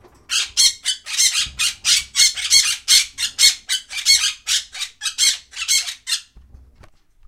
These are the sounds of a quaker parrot and sun conure
parrots, conure, parrot, sun